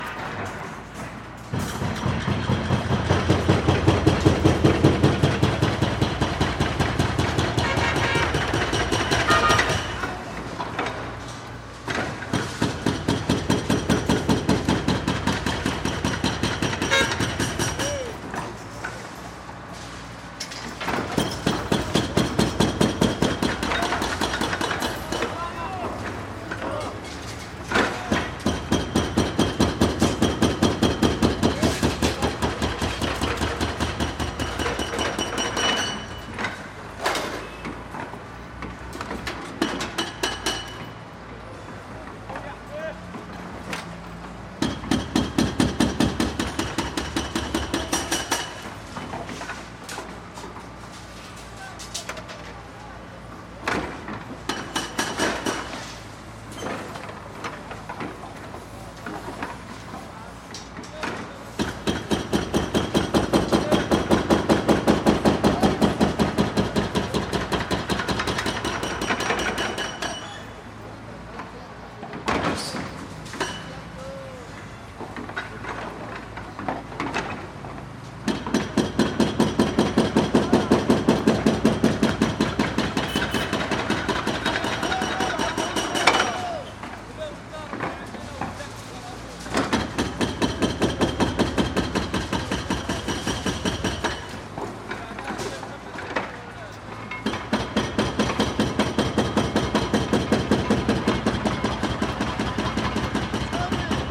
jackhammer large picking apart ruined building nearby +arabic voices Gaza 2016
jackhammer, demolition, building, ruins